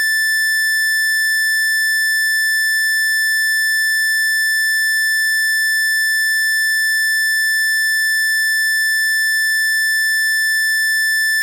Doepfer A-110-1 VCO Rectangle - A6
Sample of the Doepfer A-110-1 rectangle output.
Pulse width is set to around 50%, so it should roughly be a square wave.
Captured using a RME Babyface and Cubase.